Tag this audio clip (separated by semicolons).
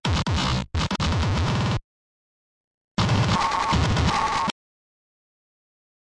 fuzzy; o; k; glitchbreak; e; processed; l; deathcore; pink; love; y; t; h